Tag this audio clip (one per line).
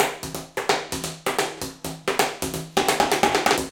groovy
loops
percs
beat
hoover
130-bpm
improvised
percussion
industrial
garbage
bottle
container
breakbeat
break
hard
perc
music
food
drum-loop
loop
drums
metal
dance
drum
cleaner
funky